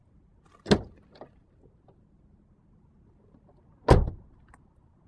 Car door opens and then closes